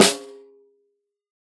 SD13x03-Pearl-MP,TSn-HdC-v09
A 1-shot sample taken of a 13-inch diameter, 3-inch deep Pearl brass piccolo snare drum, recorded with a Shure SM-58 close-mic on the batter head, an MXL 603 close-mic on the bottom (snare side) head and two Peavey electret condenser microphones in an XY pair. The drum was fitted with an Evans G Plus (hazy) head on top and a Remo hazy ambassador snare head on bottom.
Notes for samples in this pack:
Tuning:
VLP = Very Low Pitch
LP = Low Pitch
MLP = Medium-Low Pitch
MP = Medium Pitch
MHP = Medium-High Pitch
HP = High Pitch
VHP = Very High Pitch
Playing style:
CS = Cross Stick Strike (Shank of stick strikes the rim while the butt of the stick rests on the head)
HdC = Head-Center Strike
HdE = Head-Edge Strike
RS = Rimshot (Simultaneous head and rim) Strike
Rm = Rim Strike
Snare Strainer settings:
1-shot, drum, multisample, snare, velocity